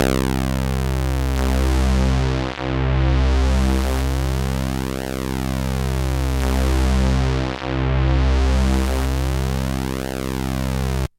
Soundsample from the Siel Opera 6 (Italy, 1982)
used for software samplers like halion, giga etc.
Sounds like the 8bit-tunes from C64
Note: C2